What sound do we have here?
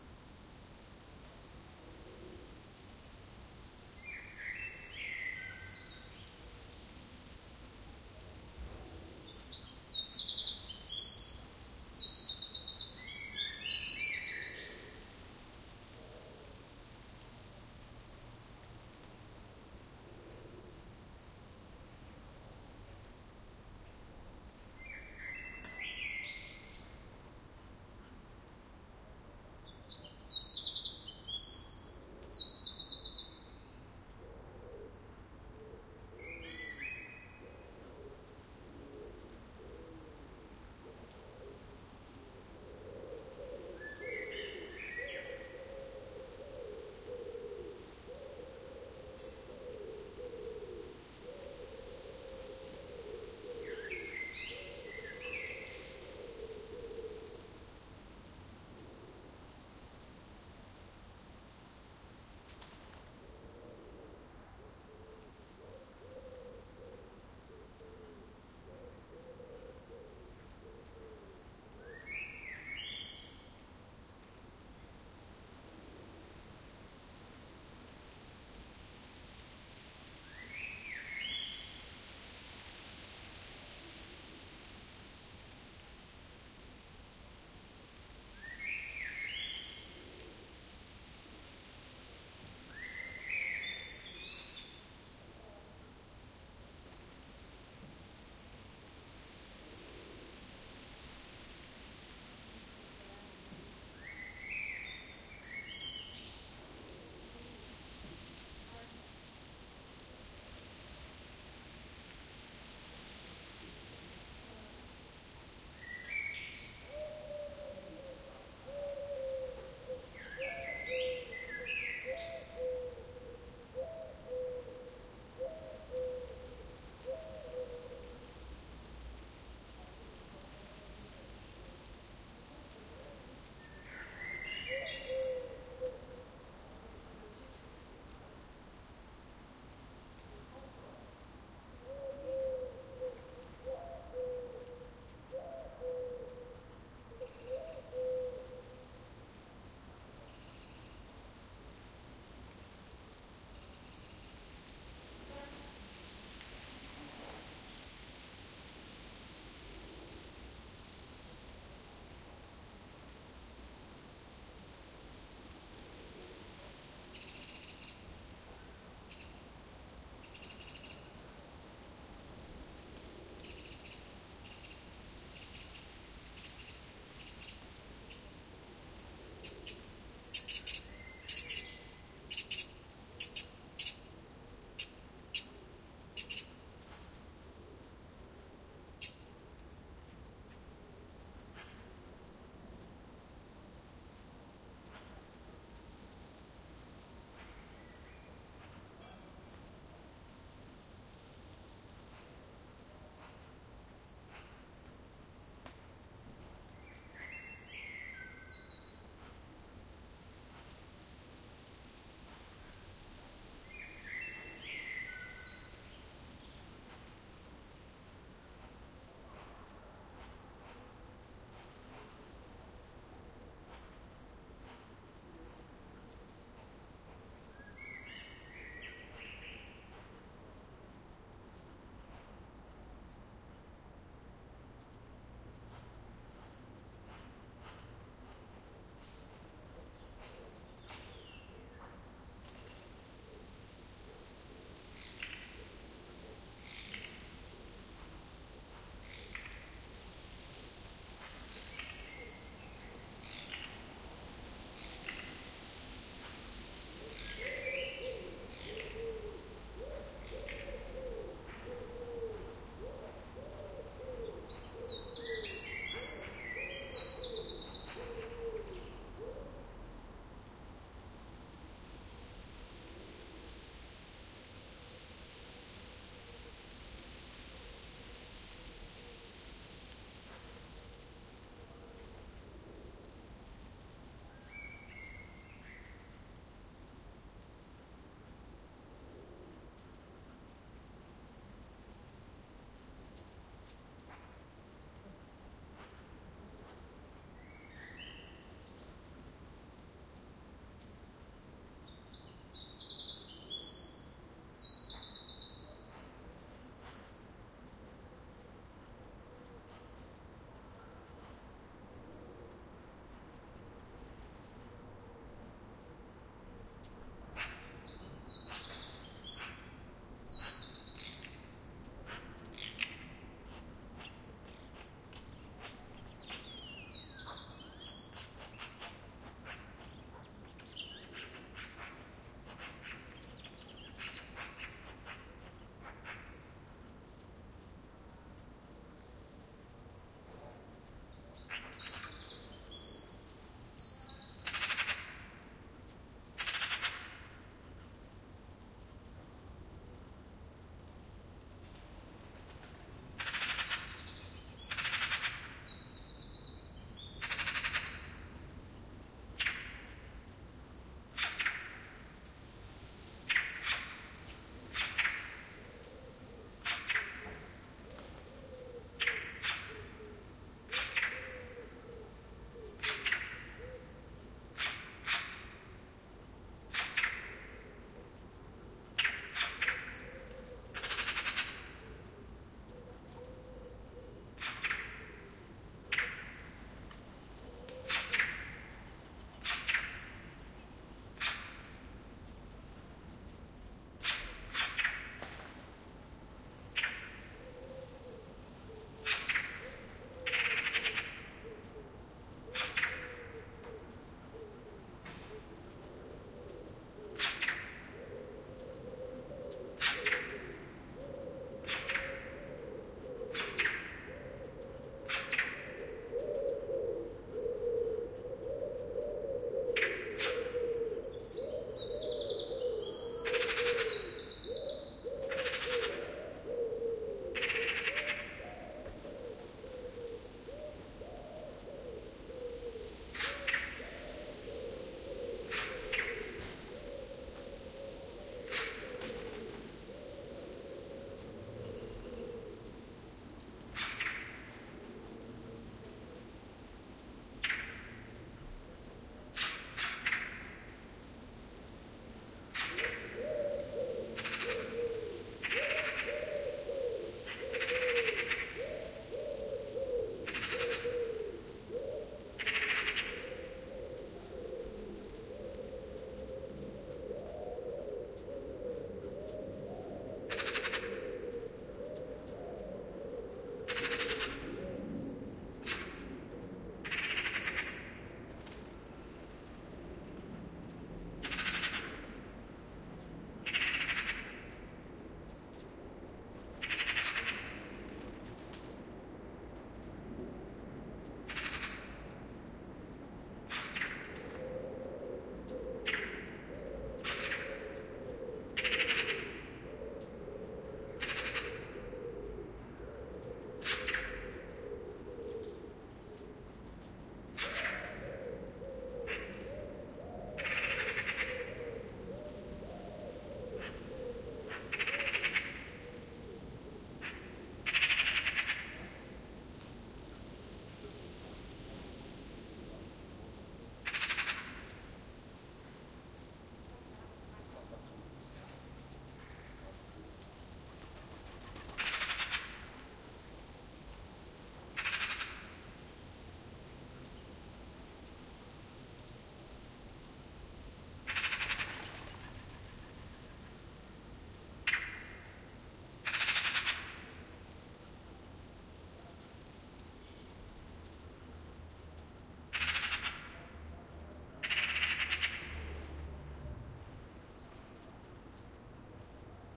Wren in the evening
Sorry about this, but it is just too tempting to upload more of our
"neighbour". The poor man is still singing to attract a mate, this time
in the evening. AT 835 ST, Quadmic preamp into iriver ihp-120.